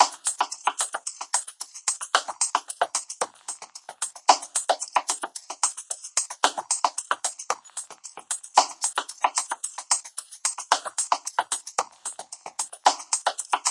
DM 70 HIHAT SNARE ELECTRO GROOVE
DuB HiM Jungle onedrop rasta Rasta reggae Reggae roots Roots
DuB, HiM, Jungle, onedrop, rasta, reggae, roots